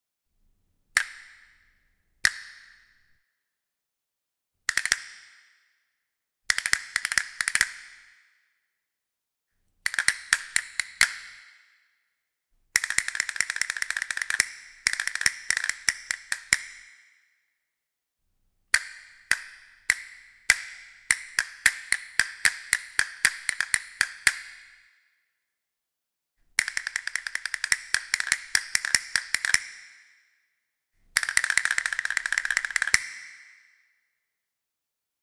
castanets wet
Samples of castanets, rolls, short grooves, etc. with added quality reverb
castanets, flamenco, percussion, roll, wood